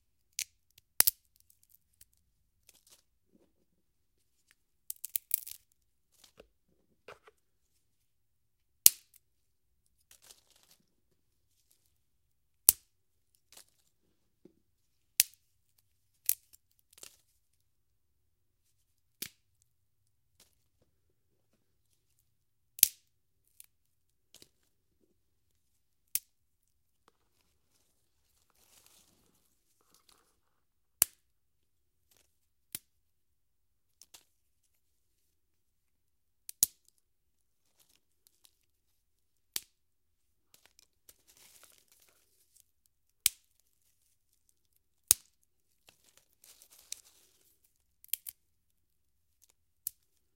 Small twigs/sticks breaking. Can be used to and depth to forest footfalls.